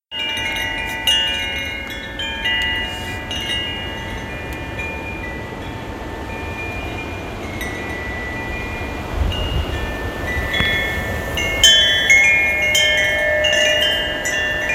windchimes windy
Wind chimes blowing in strong winds. The wind gusts are audible, so I'm hoping that doesn't ruin the chime sound.
chimes, wind-chimes, wind, windchimes